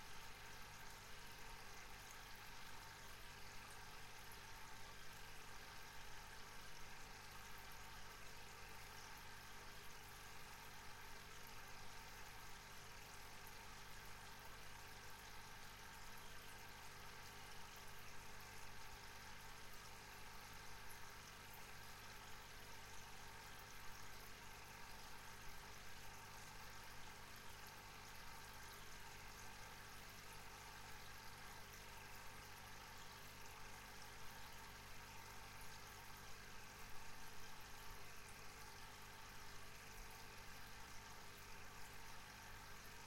aquarium gurgle with working compressor on the kitchen